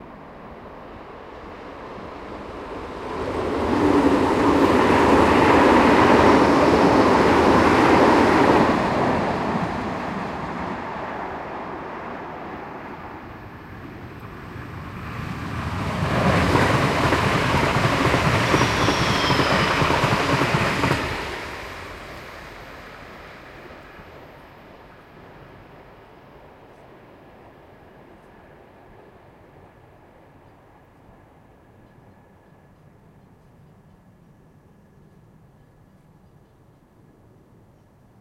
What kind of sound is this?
Two trains passing in opposite direction
rails, trains